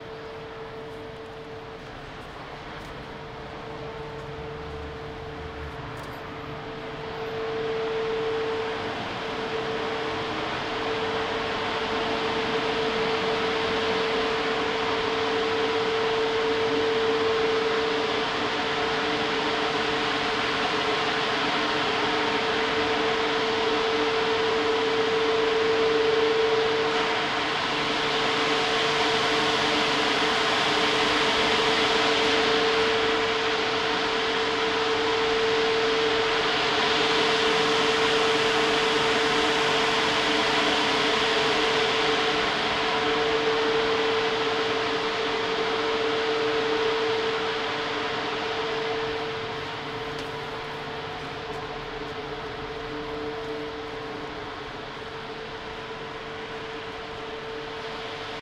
Big air extractor in Santa Caterina market, Barcelona